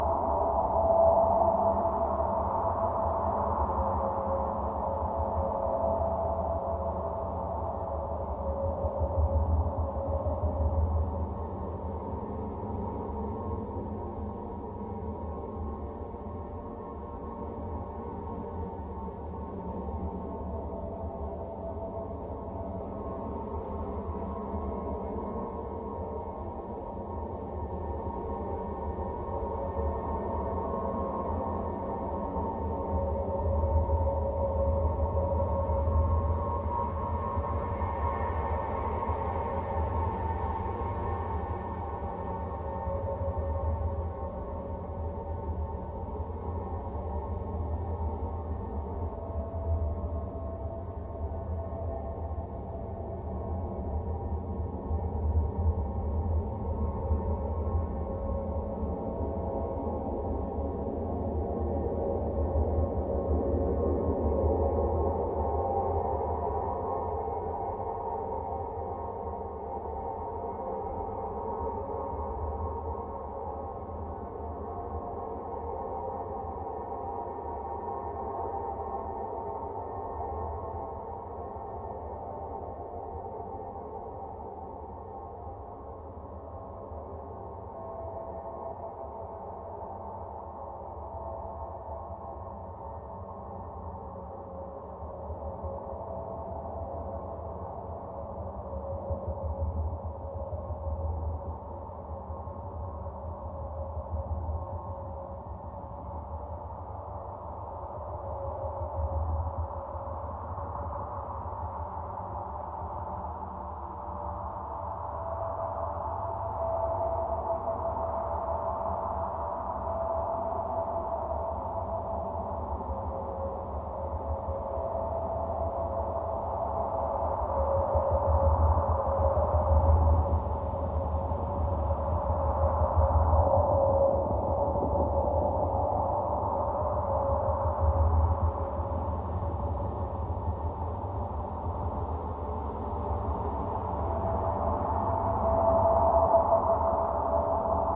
sound loop from a satellite recording of Jupiter…